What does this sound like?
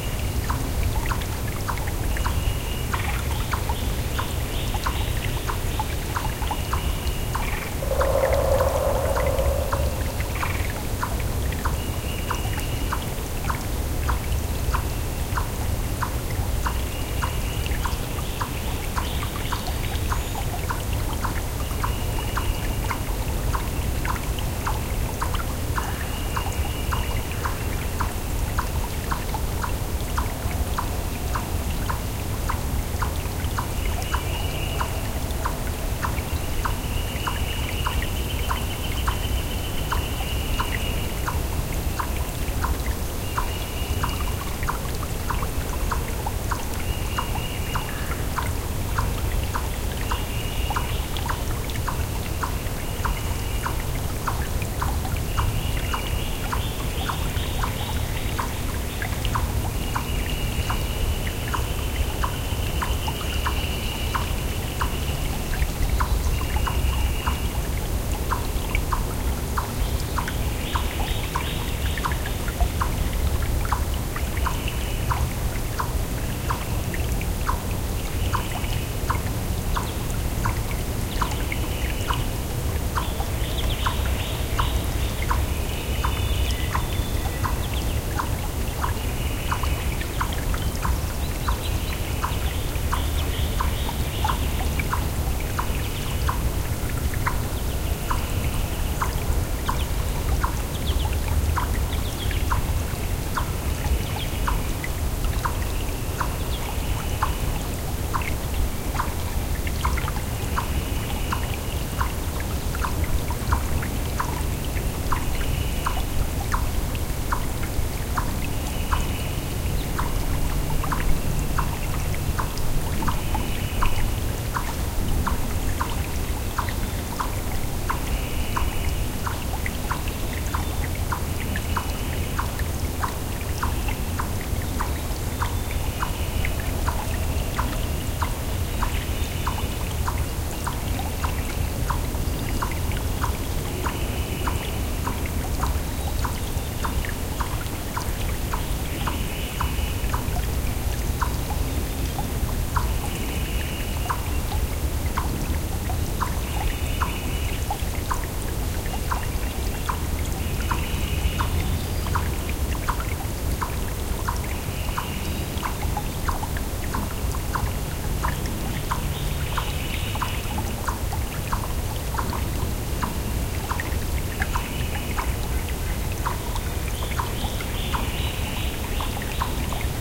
Griffey Park Stream and Birds (noisy)
This recording is a bit noisy because I recorded it at too low a level and the subsequent normalizing of the file brings in a lot of hiss. Besides that, this is another recording at Griffey Park with some sort of animal making a constant sort of clucking noise, birds in the background, one instance of a woodpecker (I think), and the gentle flowing of a brook off to the right. Recorded with my Sony MZ-N707 MD and Sony ECM-MS907 Mic.
nature, brook, ambience, birds